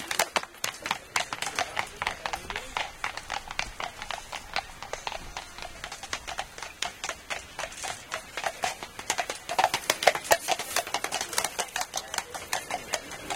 Trampling horses on the road